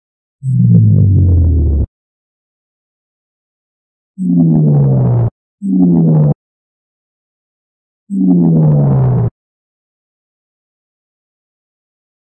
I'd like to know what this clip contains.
Synthesized sound of a bomb fall.